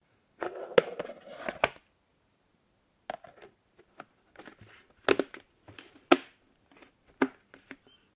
Plastic tub open & close